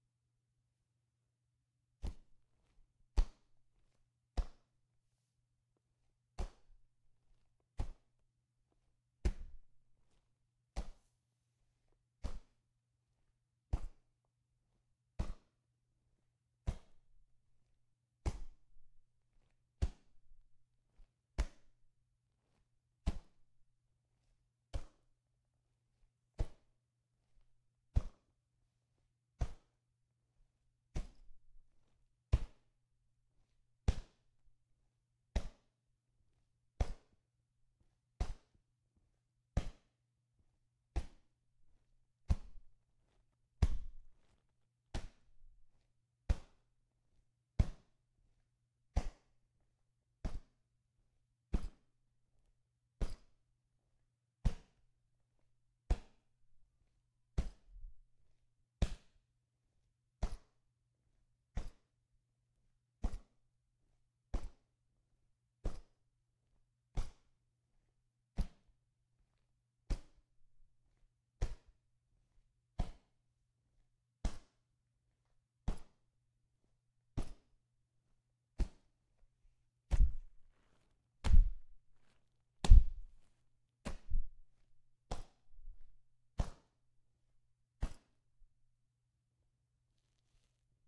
HEAVY ARMOUR
This sound I record with Zoom H6. I recorded a suitcase full of items so that it can be sounded like armor.
Armor, ArmorSound, Armour, ArmourSound, Foley, HeavyArmor, HeavyArmour, SFX